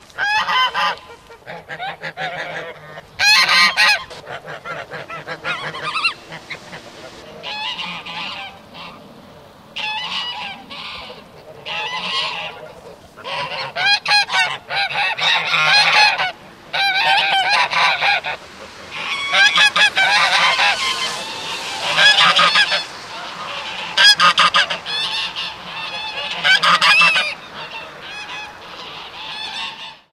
Geese cackle
Geese cackling, in the background two cars drive by. Recorded with a FlashMic.
Goose, birds, cackling, cackle, geese, animals